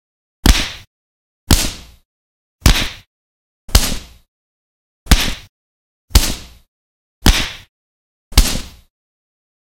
CLARKS BIG Punches Hits Impacts extreme compression
A series of similar sounding huge hits, thrown through camelcrusher (RIP)
punch, impact